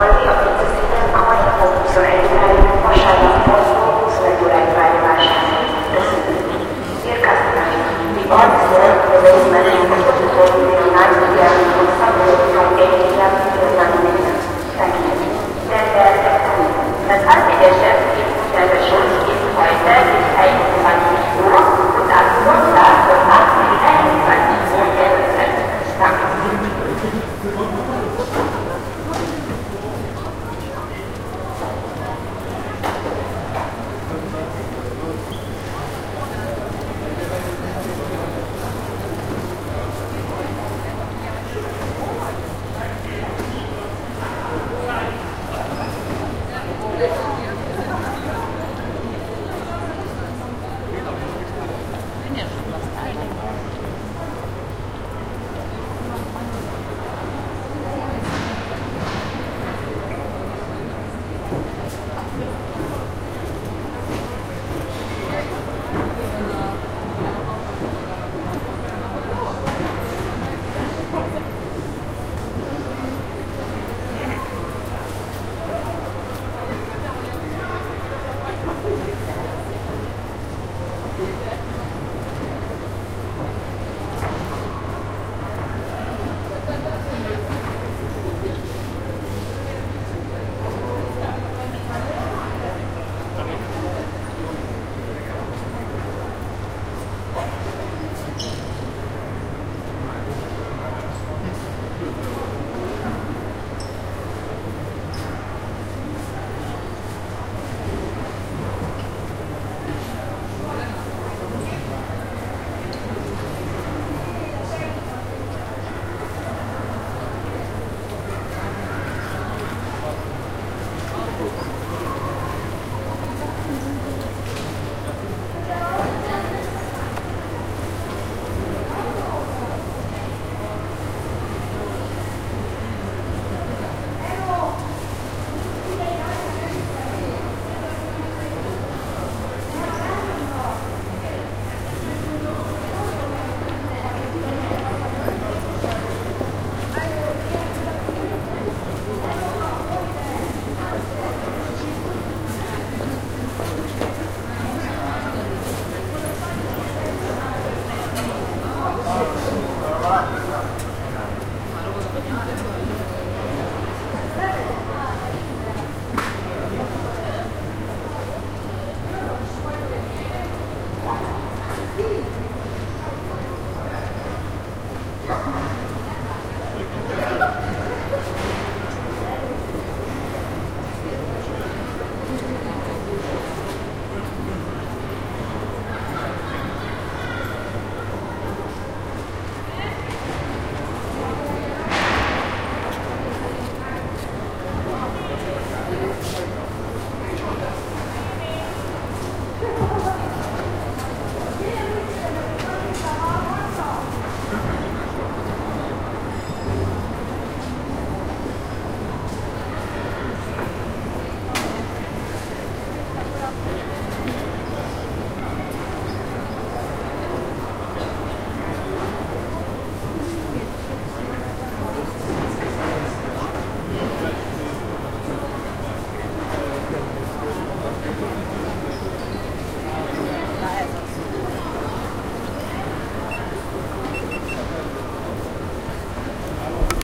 Indoor environment at Budapest Grand Market Hall
Environment of Budapest Grand Market Hall in 2014, lasd days before christmas.
budapest, grand, hall, market, people